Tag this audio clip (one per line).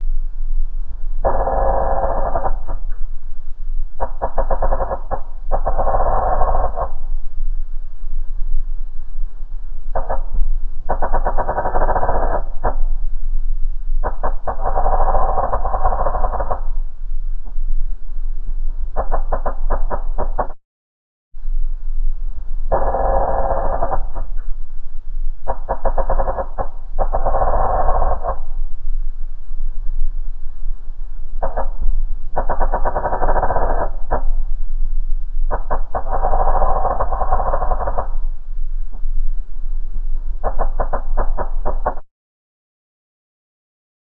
Creaking
destructive
editing
high
pressure
Sinking
sound
Submarine
underwater